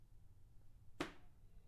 Recorded on an Iphone 6, soft snowball hitting a window.
Window, Iphone6, Snow